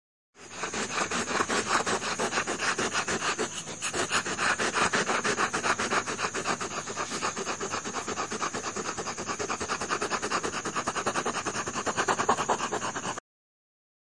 Jadeo rex
dog happy park
dog, funny, tired